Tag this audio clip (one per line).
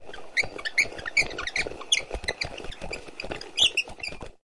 crank
device
cranking
squeaky